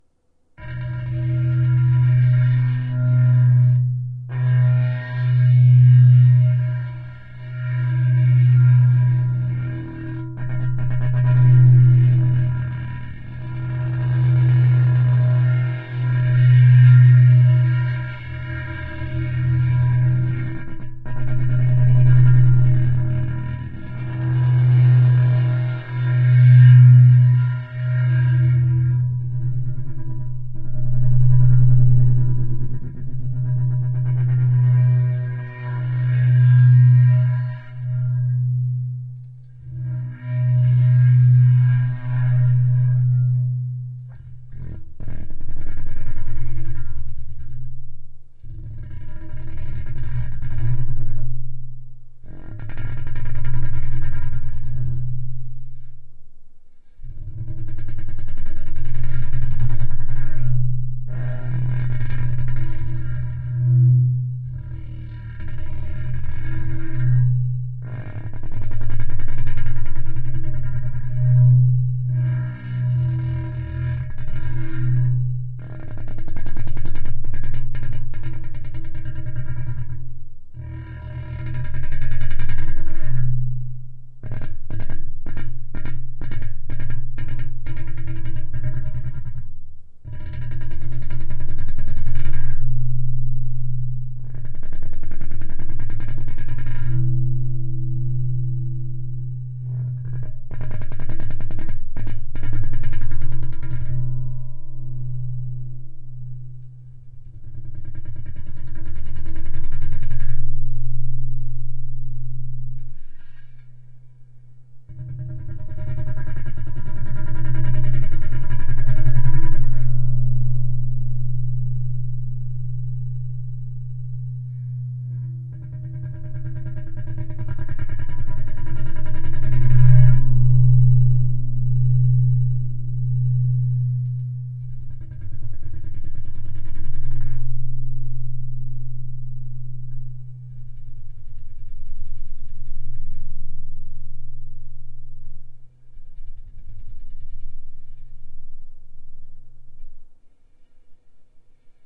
Rugoso LA 3
bohemia glass glasses wine flute violin jangle tinkle clank cling clang clink chink ring
bohemia, chink, clang, clank, cling, clink, flute, glass, glasses, jangle, ring, tinkle, violin, wine